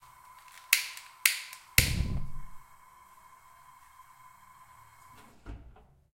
Gas Stove Fire Start Lighter 1
Lighting a stove with a barbeque lighter. Flame swoosh gives a great stereo image!
barbeque, light, camp, cooking, spark, fire, swoosh, gas, switch, burn, ignite, flammable, flame, burning, stove, lighter